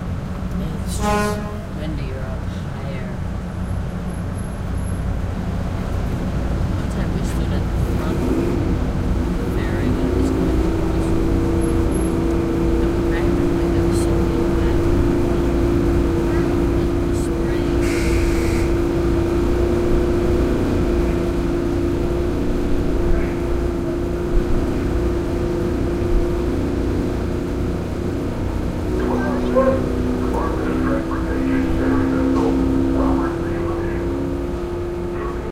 bolivar ferry horns
waiting for the ferry to start between bolivar and galveston
ship, boat, sea, buzzer, engine, honk